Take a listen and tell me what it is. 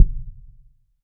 Thud 4 HighShortReverb
See description of Thud_4_Dry -- this is it with a short reverb mixed in at relatively high volume. A thud is an impulsive but very short low frequency sweep downward, so short that you cannot discern the sweep itself. I have several thuds in this pack, each sounding rather different and having a different duration and other characteristics. They come in a mono dry variation (very short), and in a variation with stereo reverb added. Each is completely synthetic for purity, created in Cool Edit Pro. These can be useful for sound sweetening in film, etc., or as the basis for a new kick-drum sample (no beater-noise).
cinematic, low, boom, synthetic, thud, kick, dark